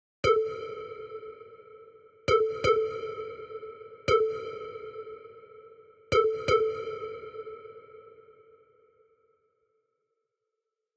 Sky Pipe Synth Stab Loop
A few pluck synth stabs with lots of reverb, kinda trancy techno vibe.
Chill, Dance, EDM, FX, House, Large, Loop, Lp, Man, Open, Pack, Pipe, Pluck, Plucky, Reverb, Rvb, Sky, Space, Spacey, Synth, Synth-Loop, Synth-Pack, Techno, Test, Trance, Wide